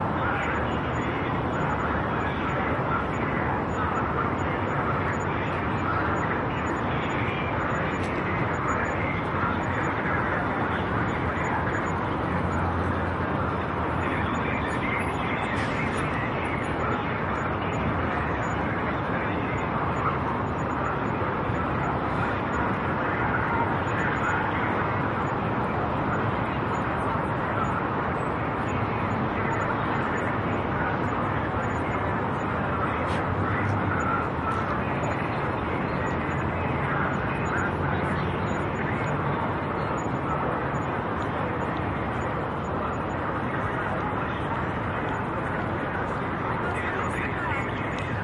I am standing at the monument of spacetravel in Moskau. Sombody in the distance is making some announcement. I have no idea what he is talking about.
announcement, binaural-recording, city, far-away, field-recording, loudspeaker, people, street, town